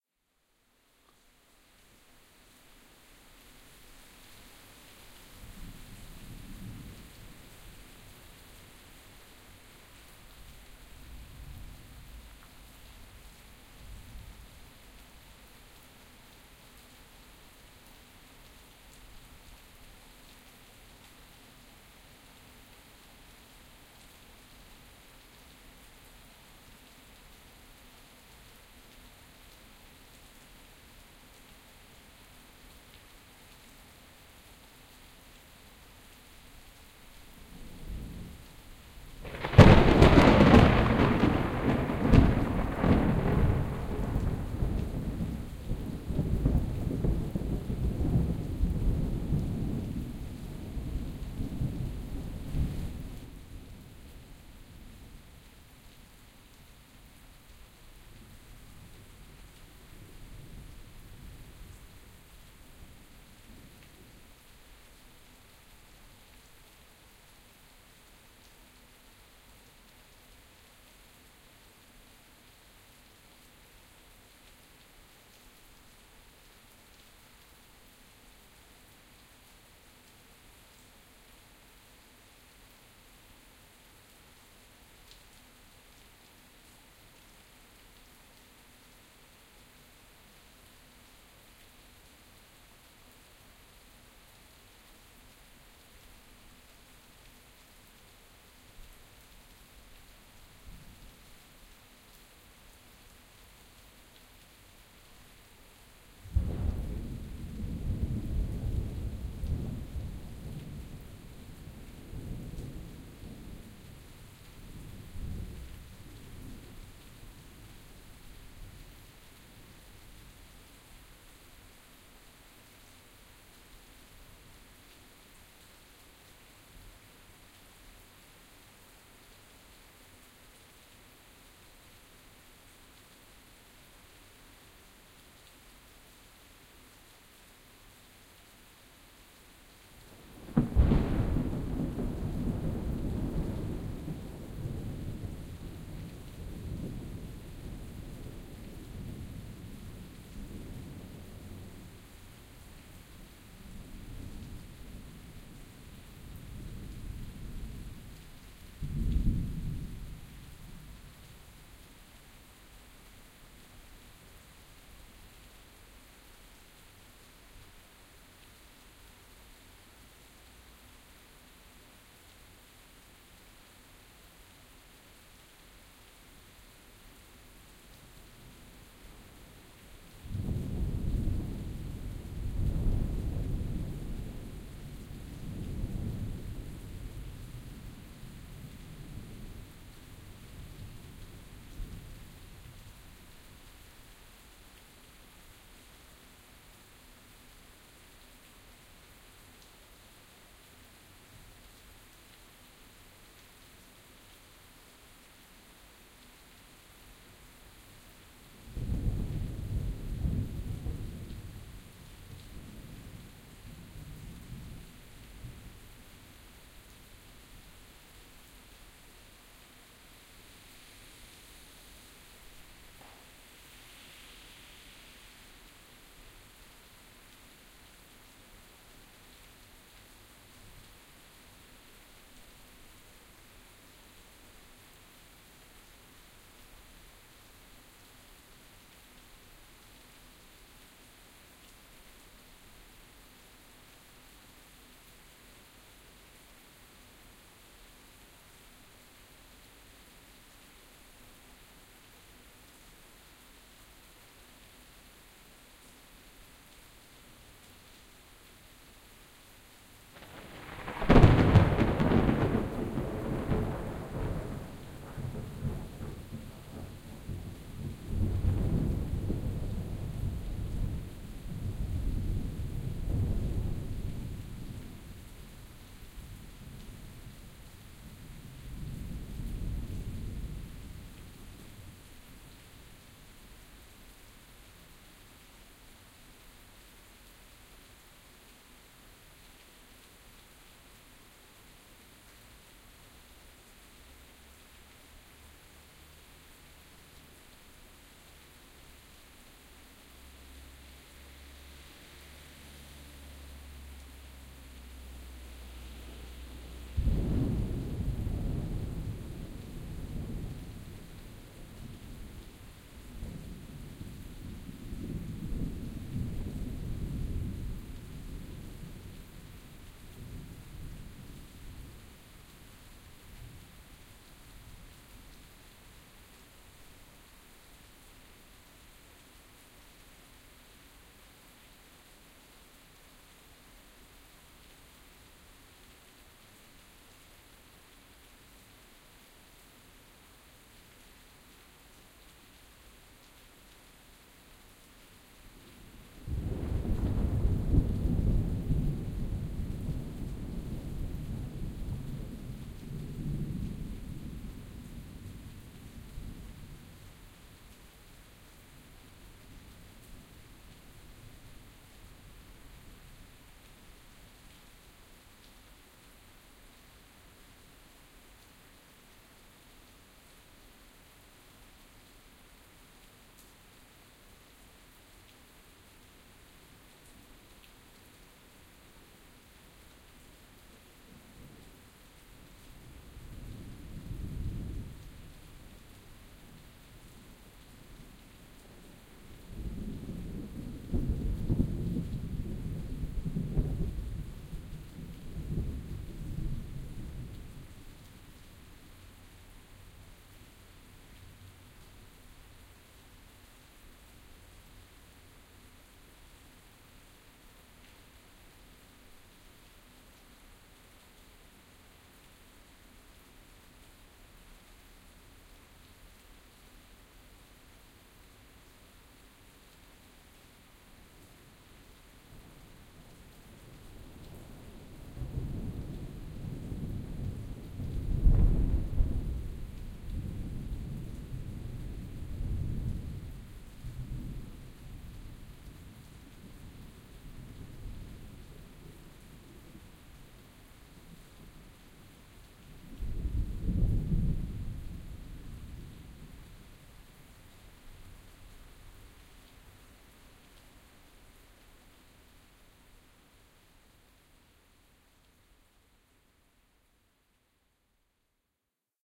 Singapore thunderstorm (binaural)
Binaural recording of a thunderstorm in Singapore on 5 September 2006.